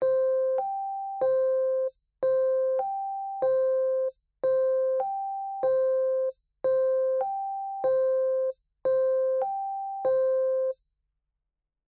A simple alert sound, or a sound to get attention
alert, attention, subtle